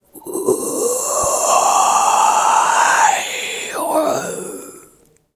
Zombie gasps
Inhuman creature zombie-like gasps. Zombie voices acted and recorded by me. Using Yamaha pocketrak W24.